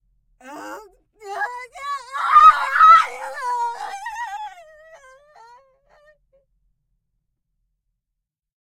A scream by Annalisa Loeffler. Recorded with Oktava 012 into M-Audio preamp. A bit of overload on the mic capsule
vocal, human, voice, horror, fear, woman, scream